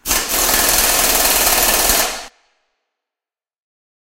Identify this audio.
Estlack pneumatic rattly 1
pneumatic drill with rattling sounds
hydraulic, machinery, robot, pneumatic, mech